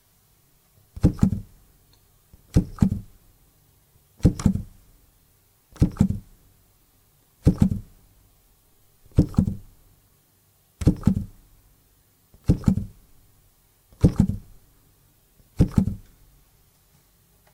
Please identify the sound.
Piano - Dead Key - Single Short
Playing single dead key on piano
dead, key, keyboard, keys, piano